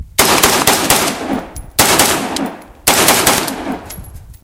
.223 gunside automatic
This is recorded at the gun, with a tight grouping to allow you to simulate automatic fire.